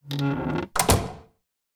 Door closing with a creaking squeak